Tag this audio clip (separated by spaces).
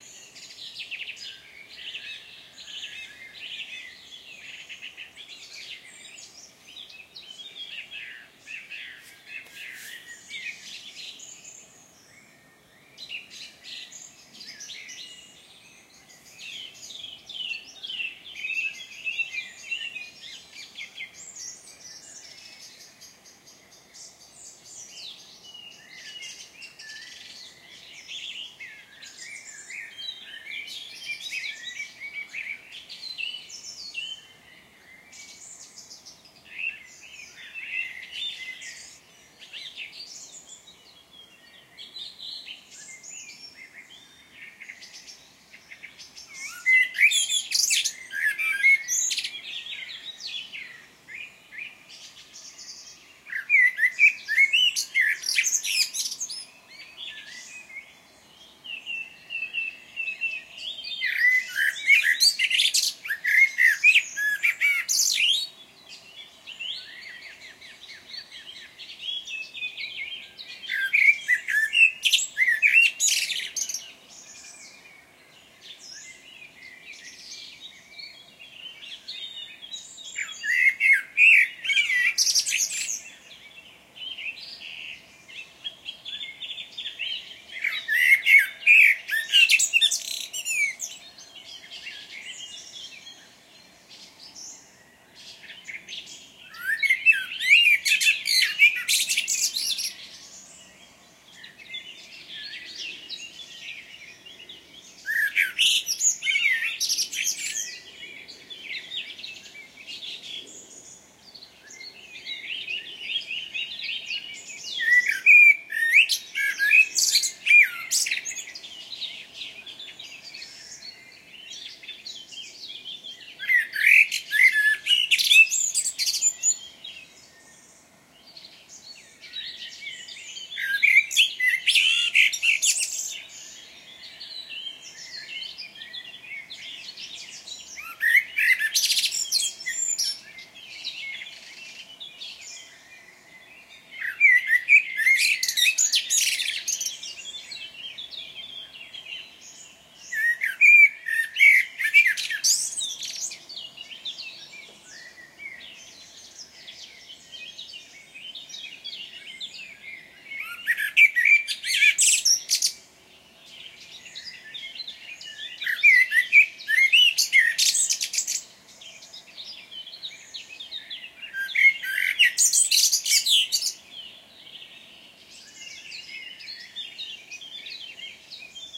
before forest morning sunrise